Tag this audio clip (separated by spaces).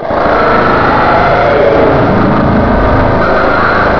deconstruction glitch lo-fi loud noise